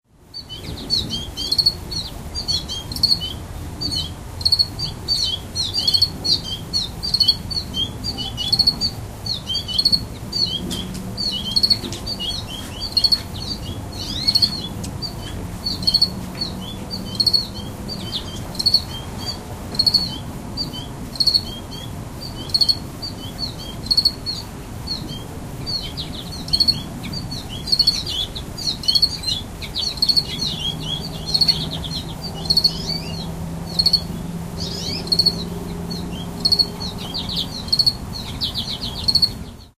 The sound of crickets and goldfinches in the sunflowers in late summer.
ambiance, birds, car, crickets, field-recording, goldfinch, insects, nature, summer, sunflowers